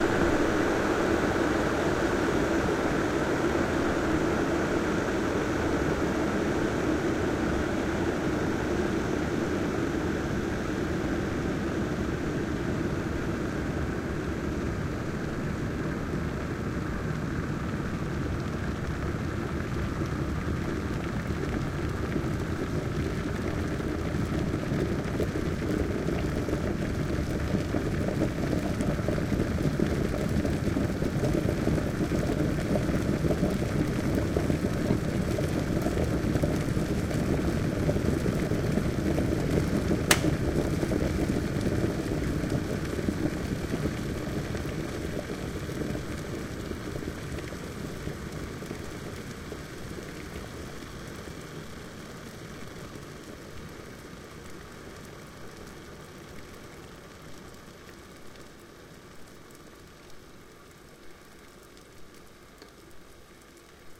Electric Water Kettle Finale
Boiling some water.
beverage, boiler, boiling, steam